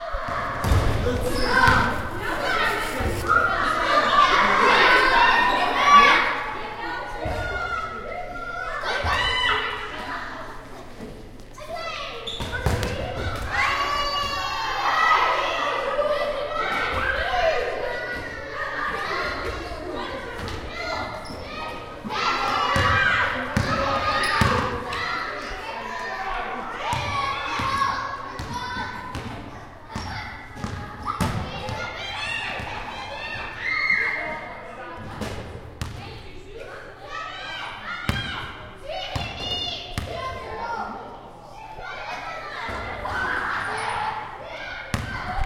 School Gym Children Playing Ambiance 3
Preteen kids playing basketball in the school gym. Recorded from the bench. Part 3 of 3.